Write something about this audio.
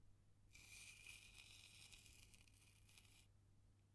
finger circling in salt \ sand